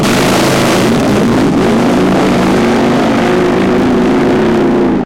nitro powered drag bike launch
audio ripped from HV40 video using Premiere Pro CS6
taken at Alaska Raceway Park